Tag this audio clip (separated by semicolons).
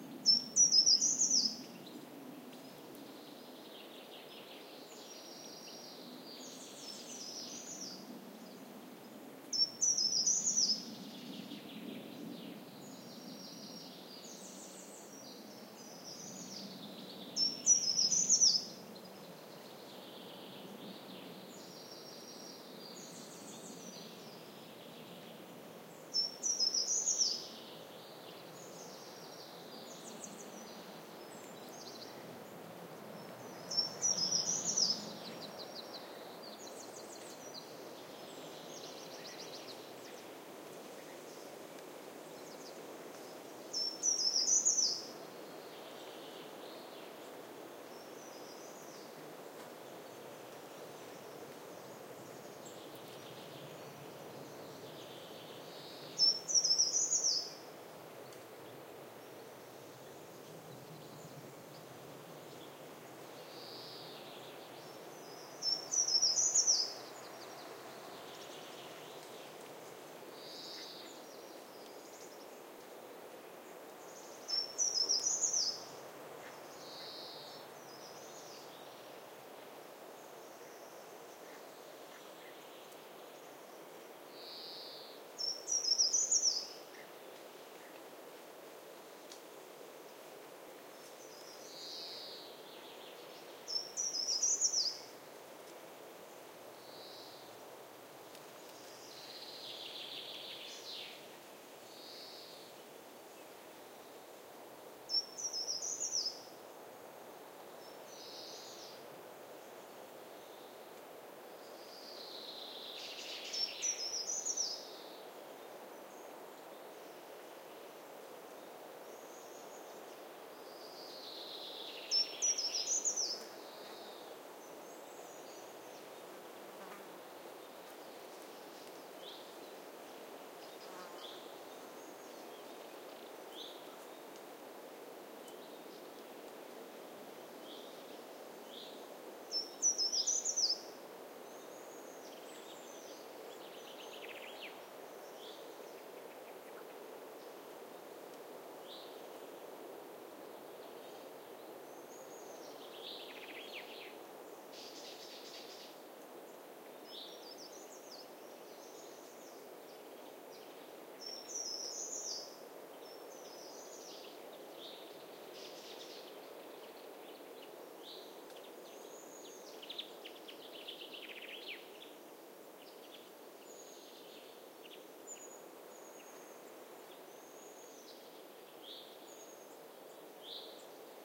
nature; spring; birds; ambiance; forest; field-recording; south-spain